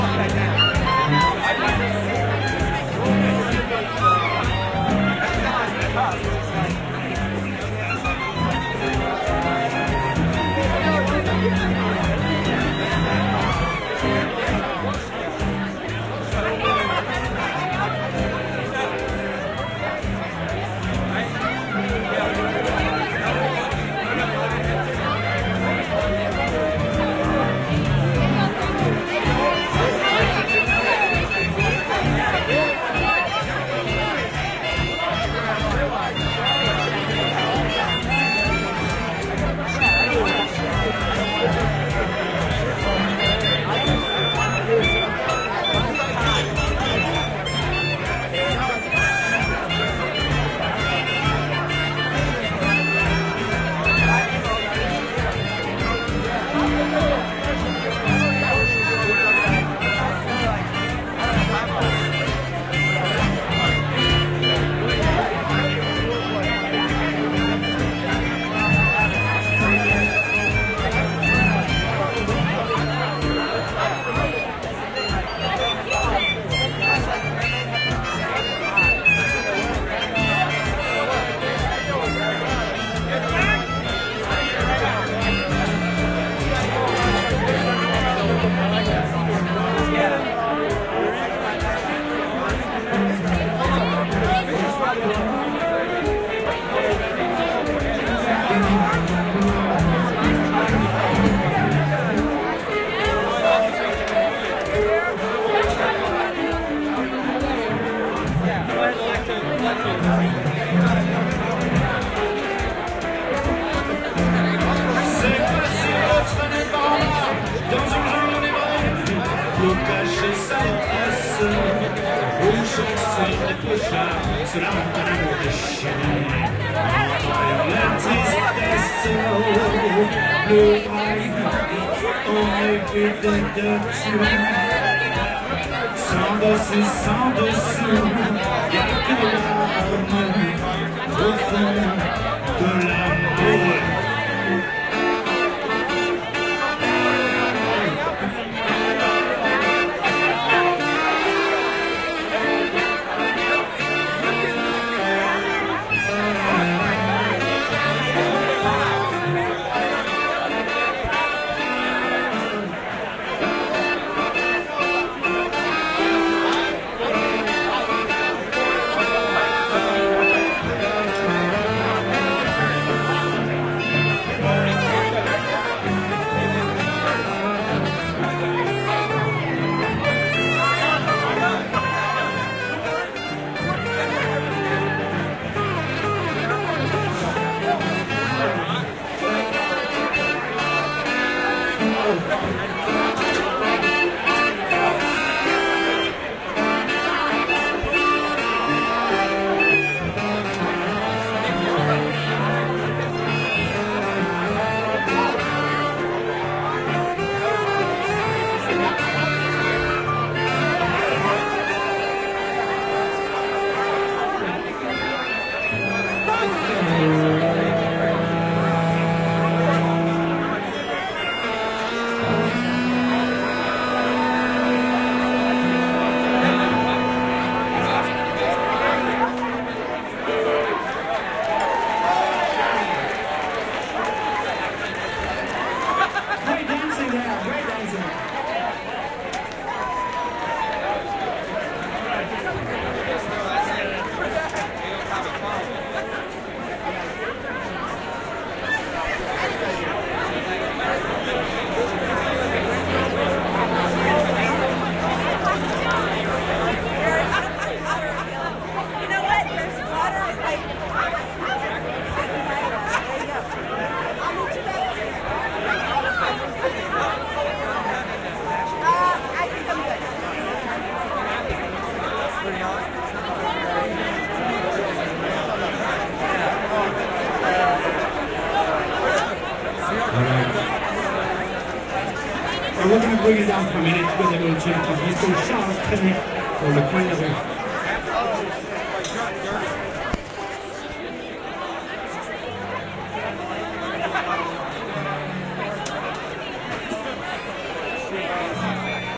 Block Party Binaural
Recording of a block party in New Orleans on Bastille Day 2017. There's a large crowd and a band playing to the left.
band, chatter, outdoor, people